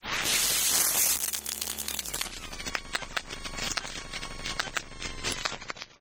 Sci-Fi - Effects - Interference, servo, filtered
fuse, charging, engine, space, interface, soundscape, spaceship, power, circuits, buzz, buzzing, scifi, swoosh, whoosh